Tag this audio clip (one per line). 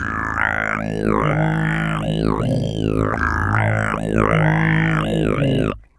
Didgeridoo
effect